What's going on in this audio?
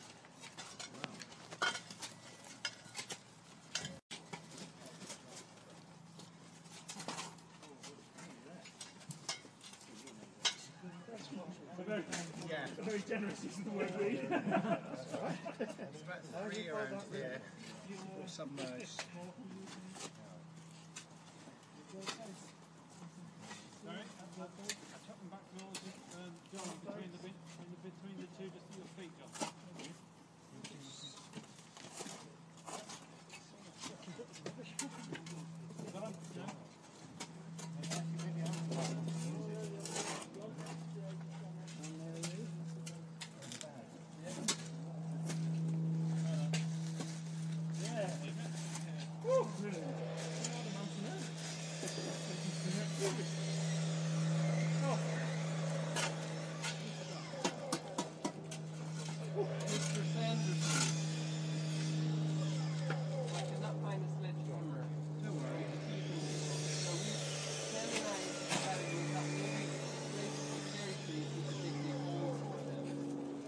Working party clearing a churchyard
This was a recording of a group of friends working late one evening clearing a church yard in Newcastle upon Tyne.
It's more general ambience, you can hear some of the digging going on as well as the general chatter.
evening, men, spades, talking, working